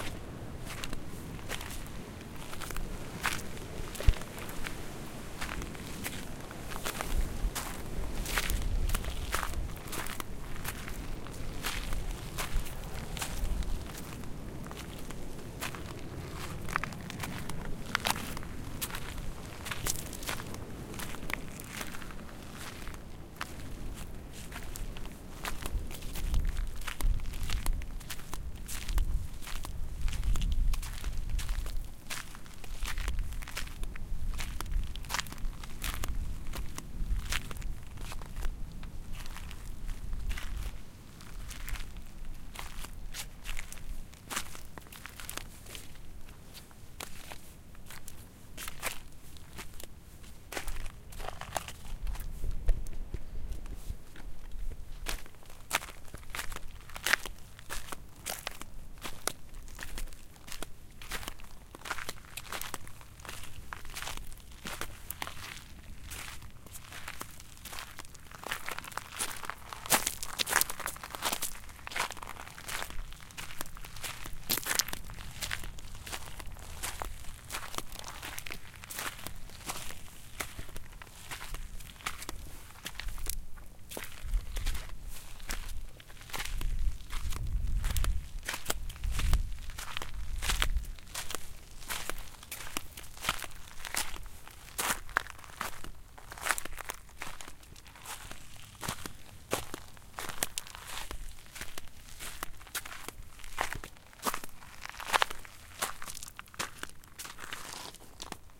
Sandal Gravel Walk
Walking on gravely trail with flip flop thong sandals. Distant waves on the black sand beach. Recorded on Maui (Hawaii).